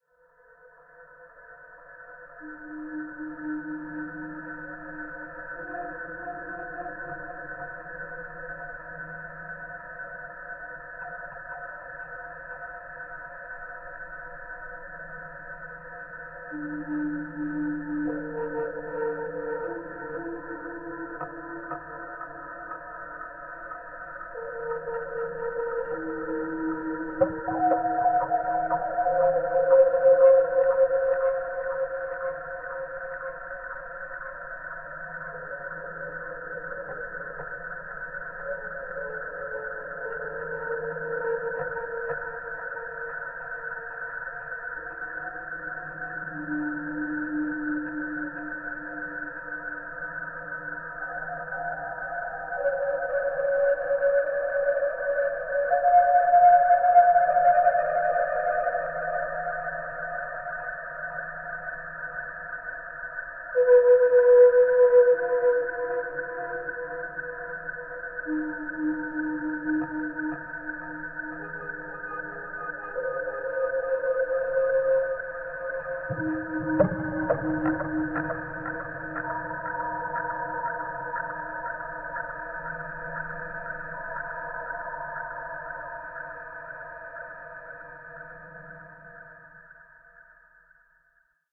ERH p1.2o2bb12 14 alien sounds floating-fortress-rwrk
remix of "p1.2o2bb12_14_alien_sounds" added by ERH (see remix link above)
slow down, edits, vocode, filter, reverb, delay, and gently compression